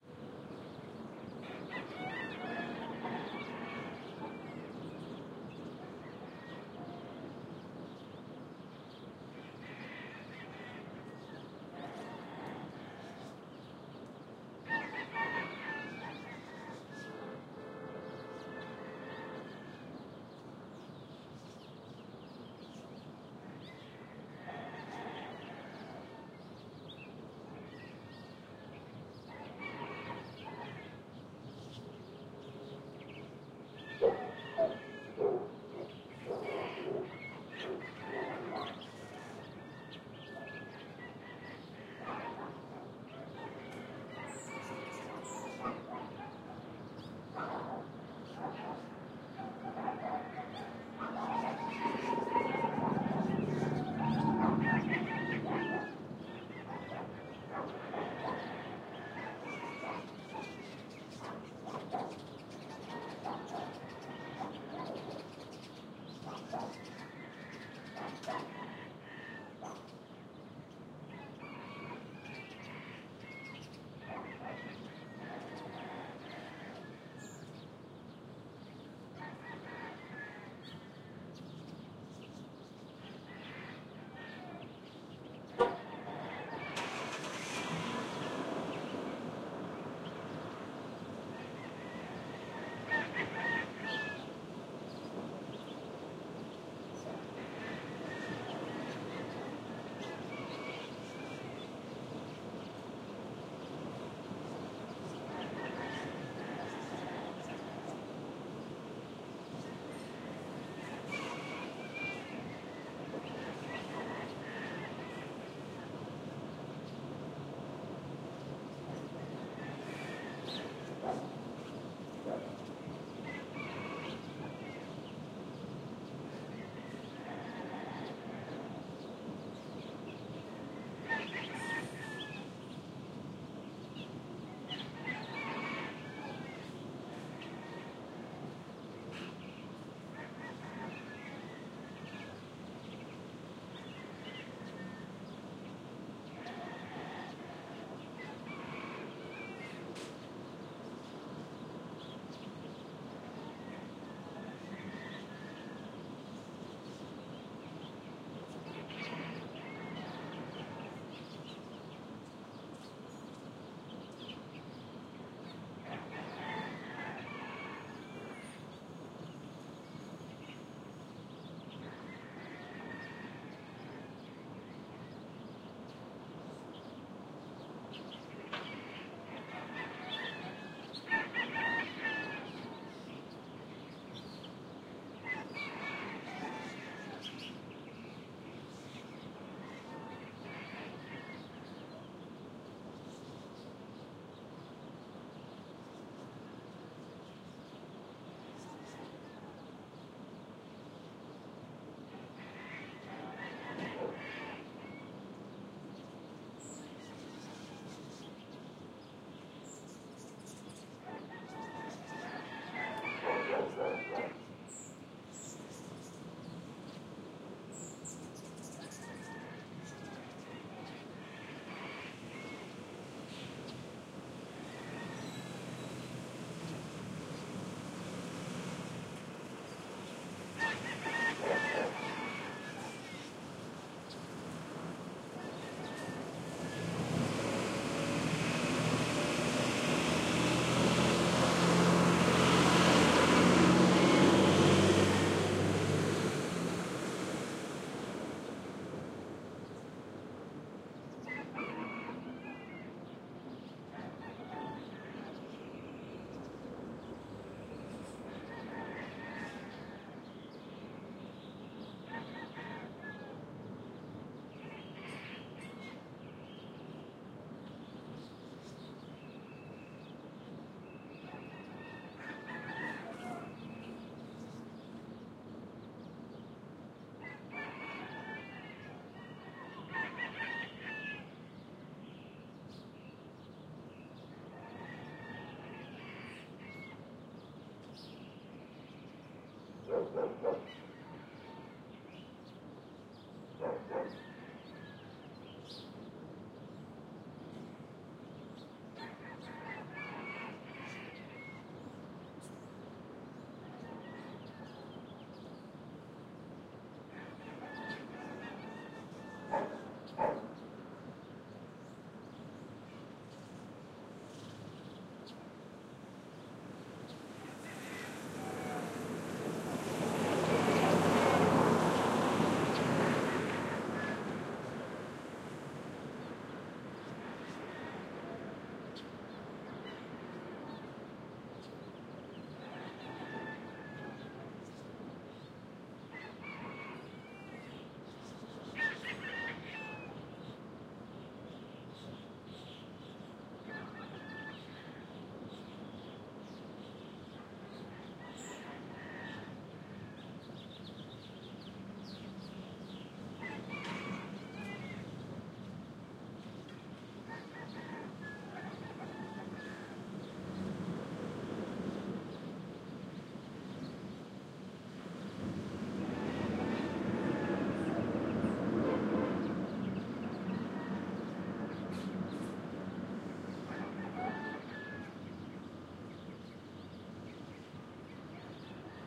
AMB S EAST LA MORNING 3
My wife and I just recently moved to East Los Angeles, and the sounds are new and wonderful. Lots of chickens, no more automatic sprinklers, and lots of early riser heading off to work. This is a stereo recording of the early morning in our new neighborhood.
Recorded with: Sound Devices 702T, Beyerdynamic MC 930 mics